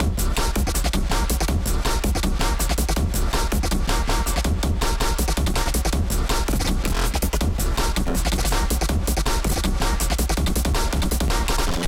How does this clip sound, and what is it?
processed acidized loop
dnb, idm, processed